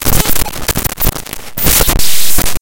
A sample from a databent file using Audacity